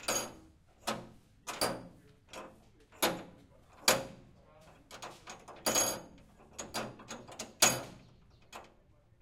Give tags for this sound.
door; fall; latch; loose; metal; rattle; turn

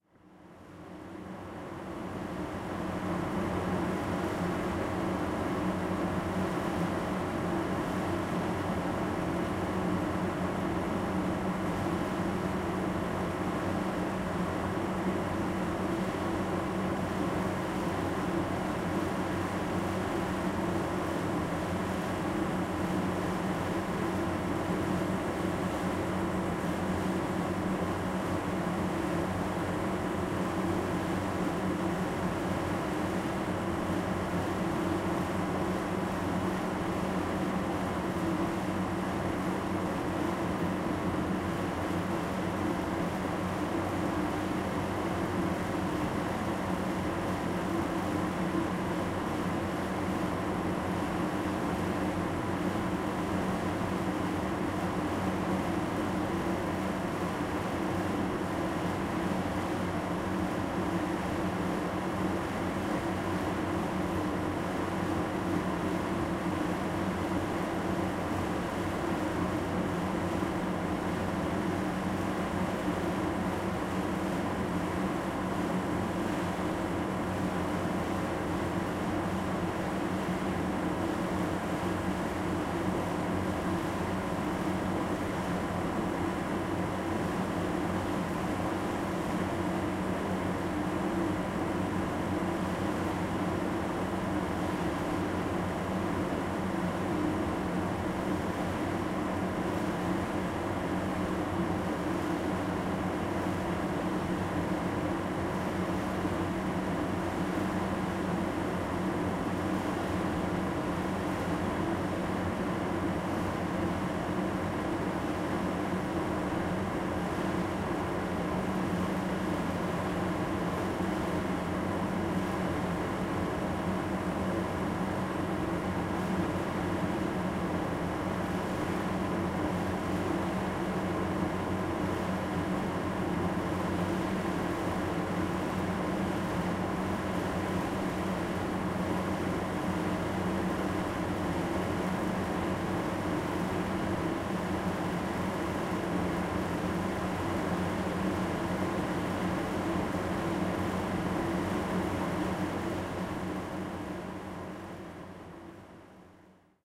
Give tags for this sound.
fan; fieldrecording; noise; park; poland; pozna

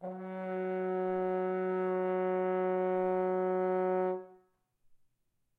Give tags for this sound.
note; 3; f; f-sharp; tone; f-sharp3; horn; french-horn

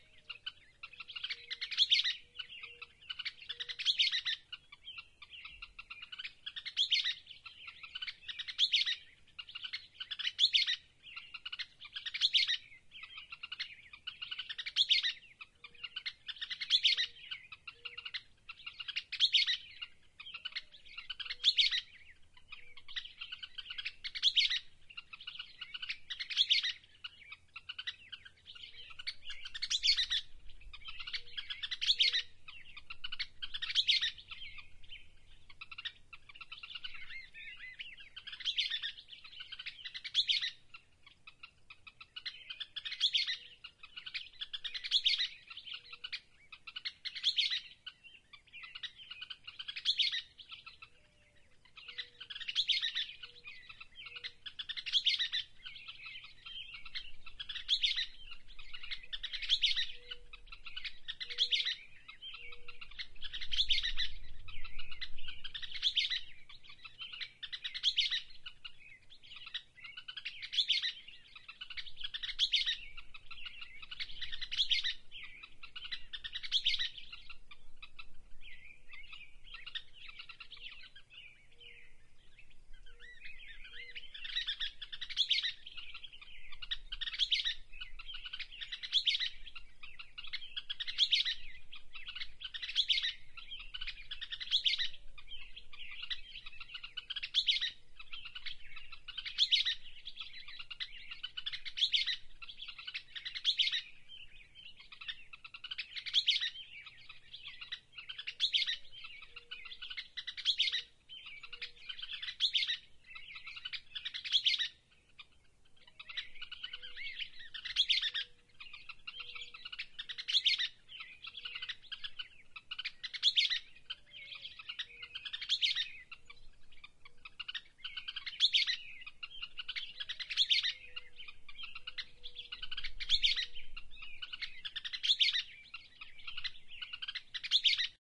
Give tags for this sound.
ambient; annoying; biophony; bird; birdsong; bruneau-dunes-state-park; cute; field-recording; geotagged; tweet

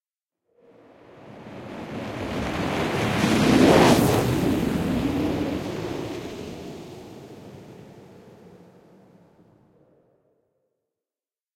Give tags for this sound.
air; army; attack; big; flight; fly; missile; projectile; rocket; sci-fi; weapon; woosh; wosh

